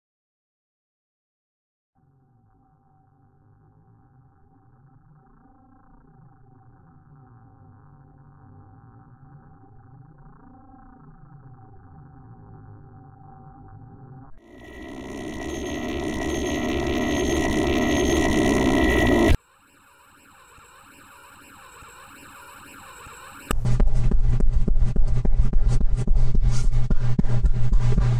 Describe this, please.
From distance a burnng space ship is trying to escape hostile cruiser, Only hope is their last working weapon is a high energy photon gun, You hear how it's started up and 10 shots are fired at the large cruiser. They missed all...it's goodbye... reactor empty. Sad.